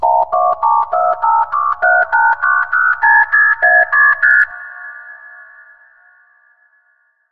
Weird phone tones through vocoder